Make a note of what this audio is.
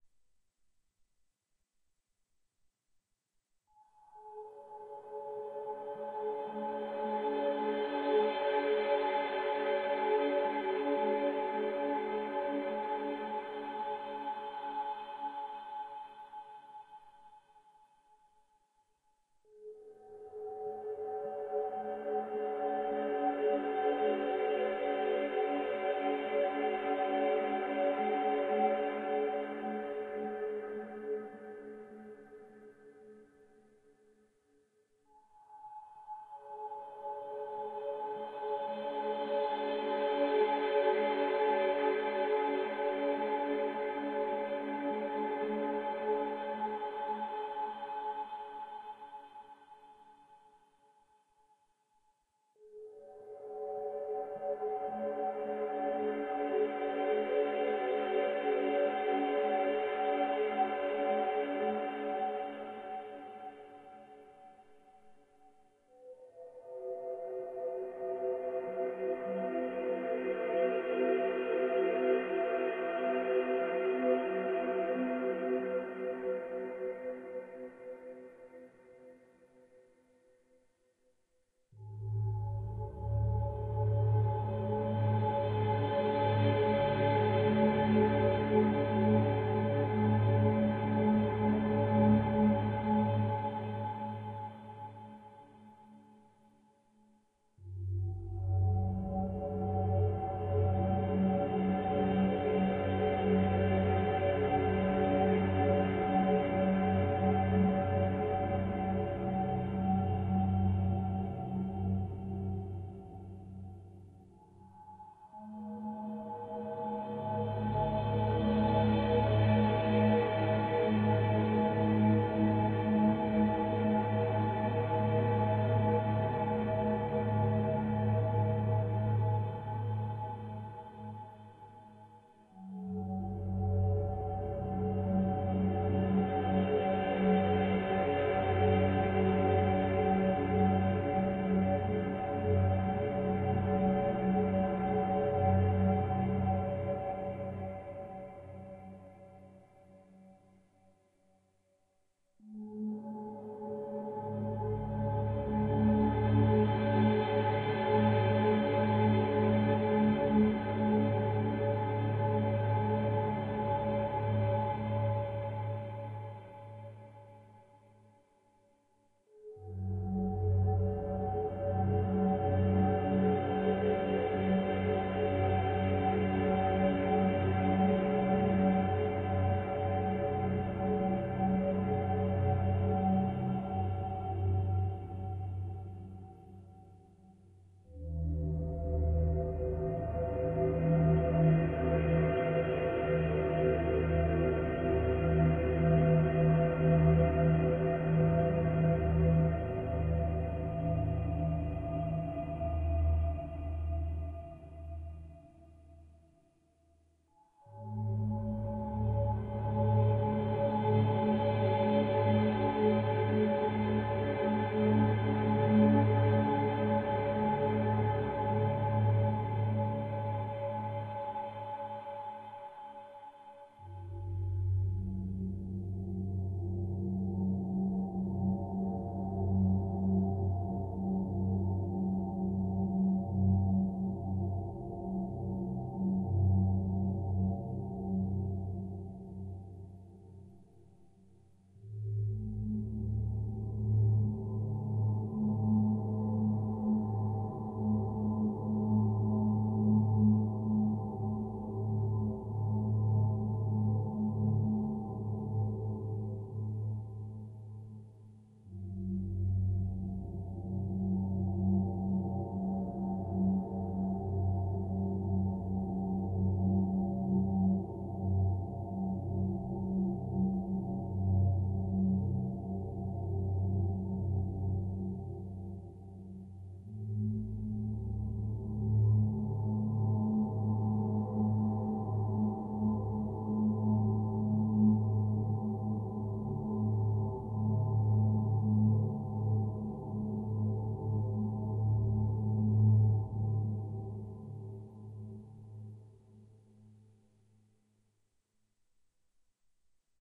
relaxation music #28
Relaxation Music for multiple purposes created by using a synthesizer and recorded with Magix studio.
ambience, relaxation, atmosphere, music, synth